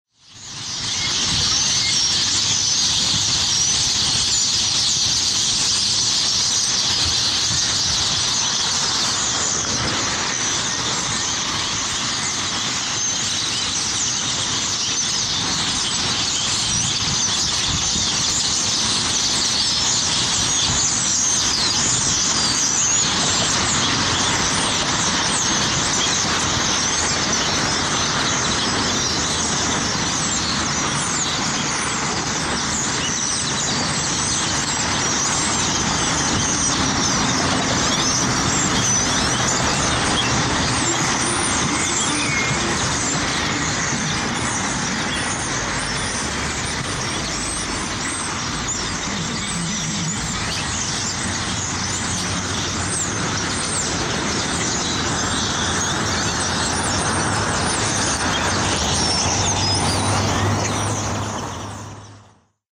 Flock of Birds Dallas, TX 75254 Beltline Dec 25 2014
This is the sound of thousands of birds (cow birds, mockingbirds, black birds, starlings, etc. ) that congregate at the intersection of Beltline and Dallas Parkway Roads in Dallas Texas.
Dallas, TX 75254
32.953843, -96.822663
bird, field-recording, bird-flock, Dallas-Texas, nature, birdsong